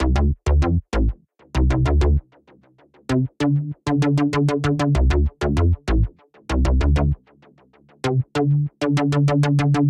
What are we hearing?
Synth BassFunk Dm 4
Ableton-Bass, Ableton-Loop, Bass, Bass-Groove, Bass-Loop, Bass-Recording, Bass-Sample, Bass-Samples, Beat, Compressor, Drums, Fender-Jazz-Bass, Fender-PBass, Funk, Funk-Bass, Funky-Bass-Loop, Groove, Hip-Hop, Jazz-Bass, Logic-Loop, Loop-Bass, New-Bass, Soul, Synth, Synth-Bass, Synth-Loop